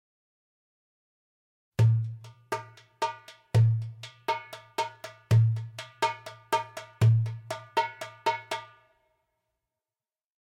02.Kalamatianos 8th notes
This is a widely spread Greek rhythm and dance. Most commonly notated as a 7/8 rhythm. The name originates from an area in Peloponisos. In this recording it is played using 8th values. This is the most basic(striped down) form of this rhythm.
Musician: Kostas Kalantzis.
CompMusic, darbuka, kalamatianos